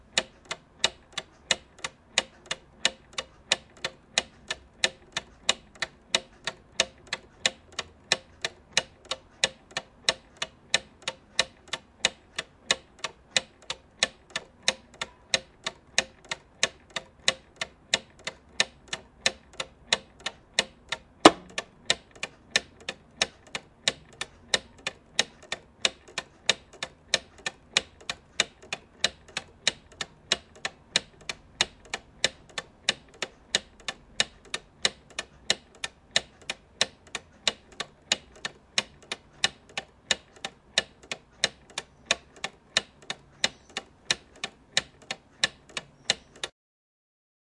plastic clock tick near nm
a build-it-yourself plastic clock pendulum. recorded on a rode ntg3.